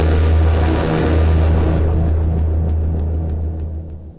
Sine wave created and processed with Sampled freeware and then mastered in CoolEdit96. Stereo simulation of mono sample stage one with alternate digital processing resulting in a more uneasy feeling, or nausea.
free, hackey, hacky, larry, sac, sack, sample, sine, sound, synthesis